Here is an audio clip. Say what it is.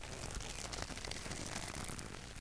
Growing bulbous nose
This is a short, squeaky sound which fits perfect to a growing plant or tuberous nose.
Made with Audacity and two gum balls and a rubber Simpsons figure.
ball, bizarre, creak, creek, grow, growing, gum, nose, plant, plastic, rubbed, rubber, rubberball, screech, squeak, squeal